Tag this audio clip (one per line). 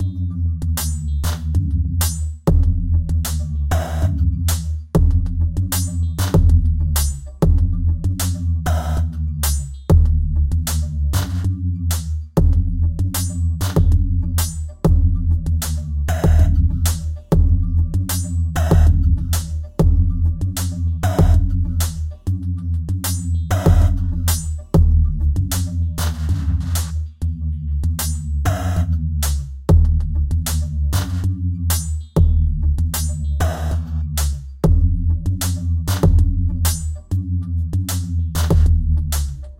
beat
electro
loop
drum